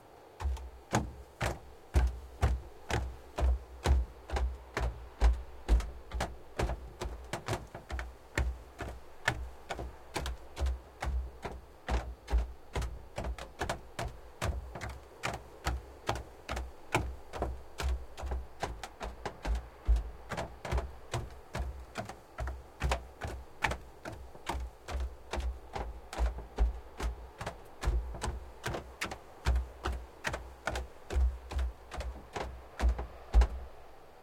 Running on wooden platform near the seaside version 3
Sound of a person running on a wooden platform. Ambient sounds which also can be heard are the ocean and crickets in the background.
Recorded on the Zoom F4 and Rode M5's
running, footsteps, walking